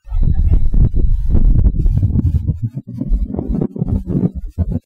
wind sort of
my mic in front of my fan